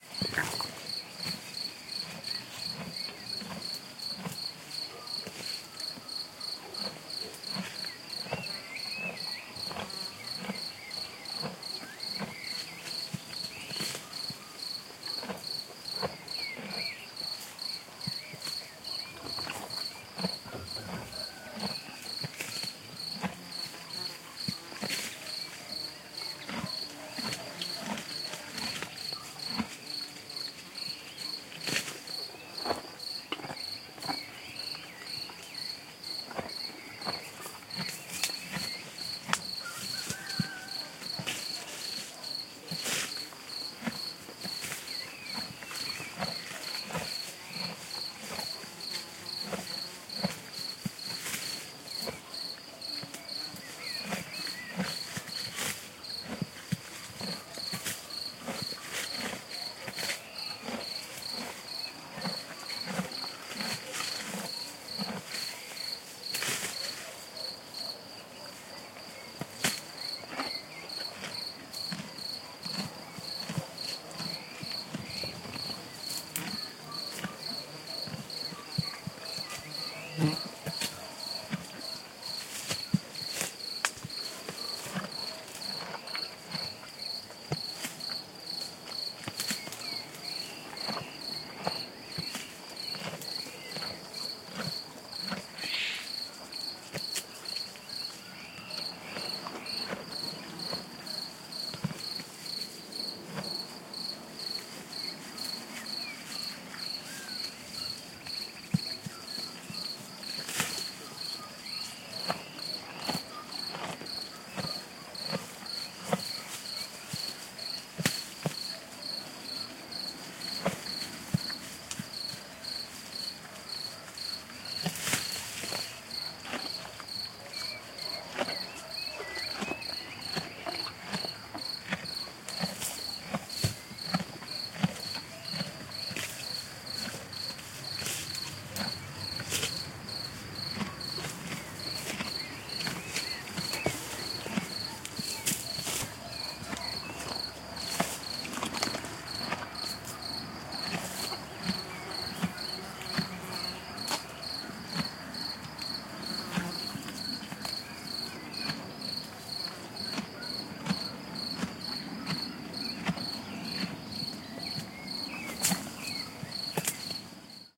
20190510.grazing.horse.71
The noises an old horse made while he grazed. Crickets and birds in background. Audiotechnica BP4025 into Sound Devices Mixpre-3. Recorded near Aceña de la Borrega (Caceres Province, Extremadura, Spain)